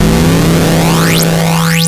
Atari FX 08
Soundeffects recorded from the Atari ST
YM2149, Soundeffects, Atari, Electronic, Chiptune